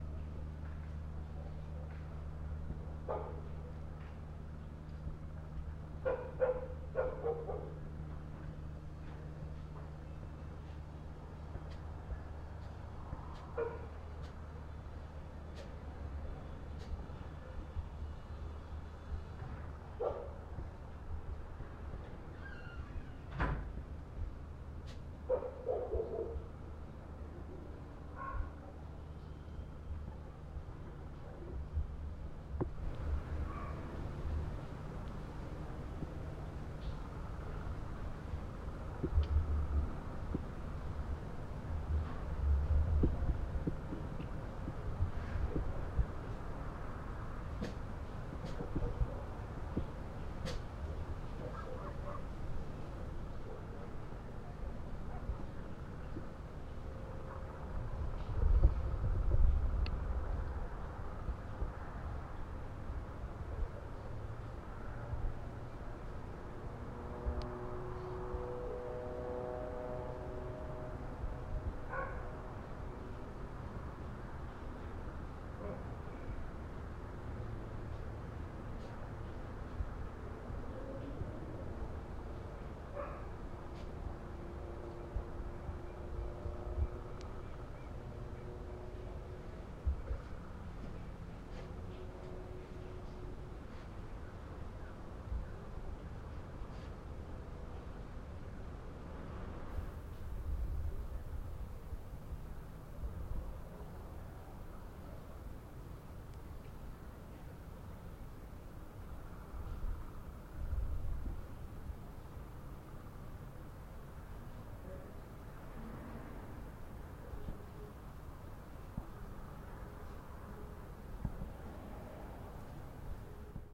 Outside evening ambience: crickets, dogs barking, evening light breeze, doors creaking open in the distance. OWI. Recorded with a Rode Ntg-2 dynamic microphone and Zoom H6 recorder. Post processed to take away excess gain noise. Recorded in the Vorna Valley neighborhood.